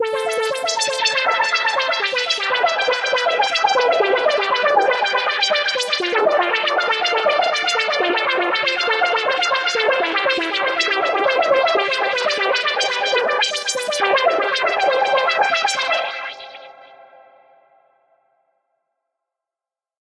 ARP C - var 3
ARPS C - I took a self created sound from Gladiator VSTi within Cubase 5, played some chords on a track and used the build in arpeggiator of Cubase 5 to create a nice arpeggio. Finally I did send the signal through several NI Reaktor effects to polish the sound even further. 8 bar loop with an added 9th and 10th bar for the tail at 4/4 120 BPM. Enjoy!
sequence, melodic, synth, 120bpm, harmonic, arpeggio